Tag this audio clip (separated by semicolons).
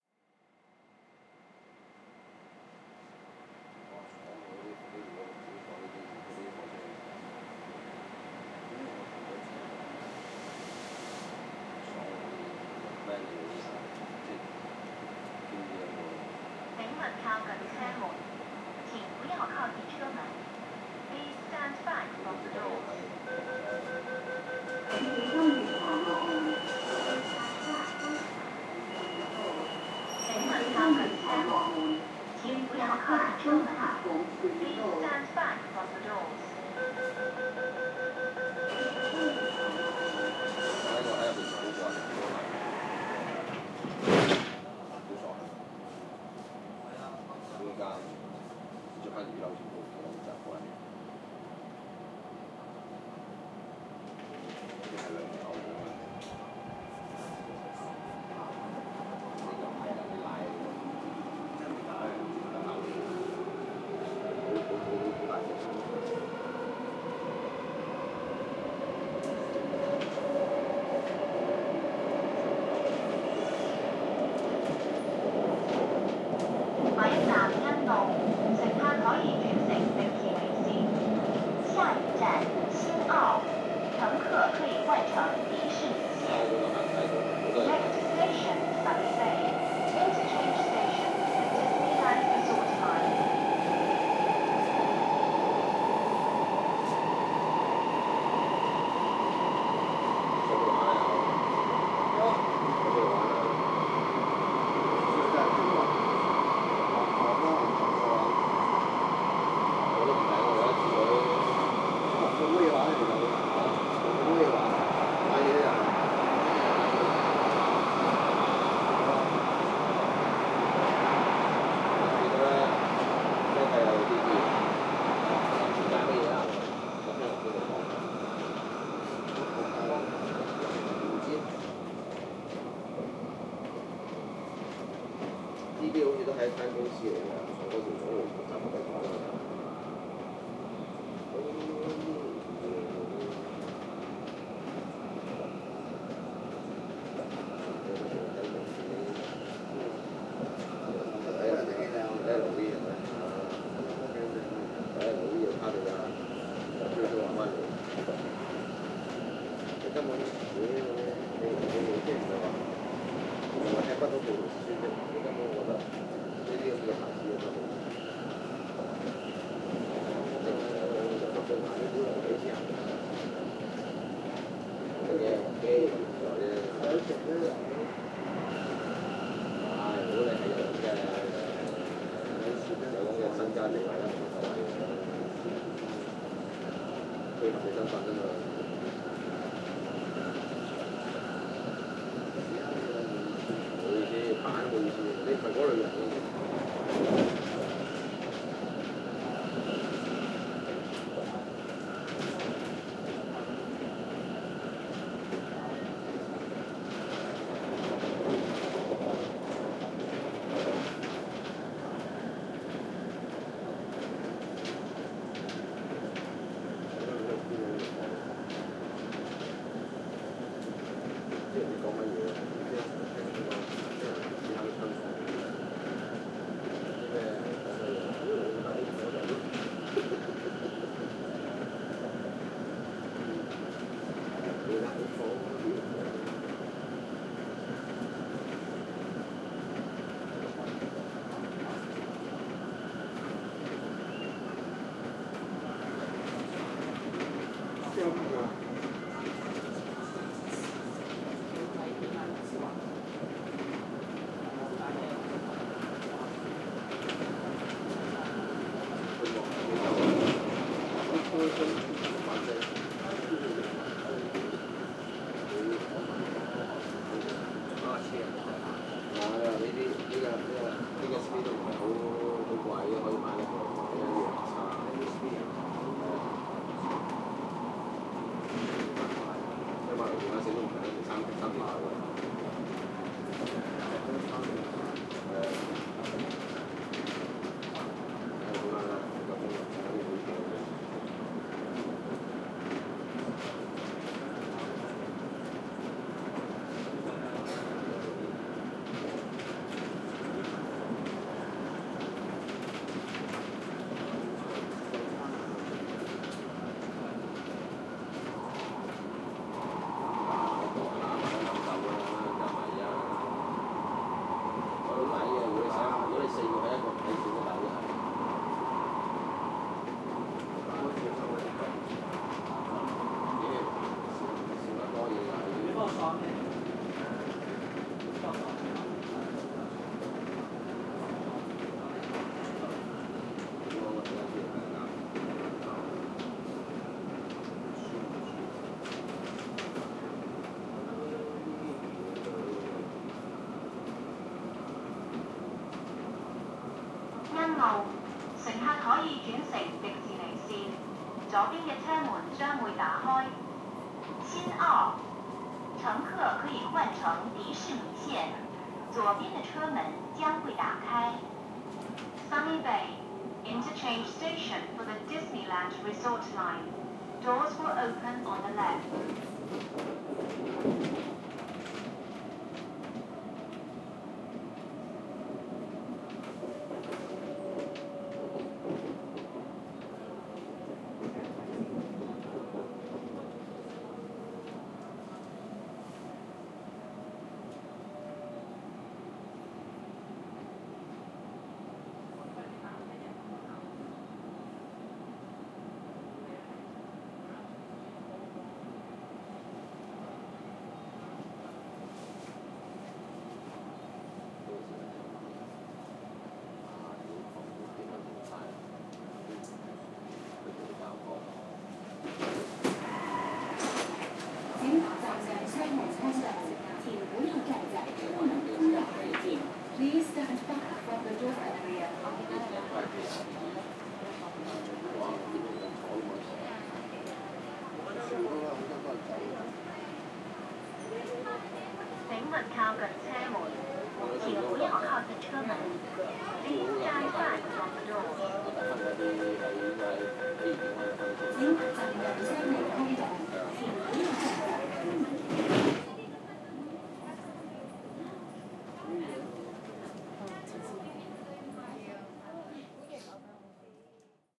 mtr; railway; subway